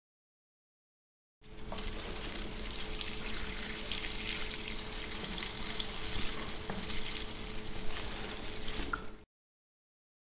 Sound of household chores.
chores, CZ, Czech, household, Pansk, Panska